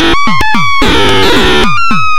110 bpm FM Rhythm -40
A rhythmic loop created with an ensemble from the Reaktor
User Library. This loop has a nice electro feel and the typical higher
frequency bell like content of frequency modulation. Experimental loop
with a strange, harsh melody. The tempo is 110 bpm and it lasts 1 measure 4/4. Mastered within Cubase SX and Wavelab using several plugins.
110-bpm, fm, loop, electronic, rhythmic